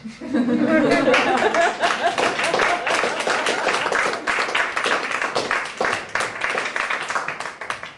Audience Laughing Applause03
Audience in a small revue theatre in Vienna, Austria. Recorded with consumer video camera.
applauding, clapping, crowd, laughing, applause, group, audience, cheering